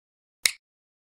Different Click sounds
And maybe send me link to the video.
Click, lego, stone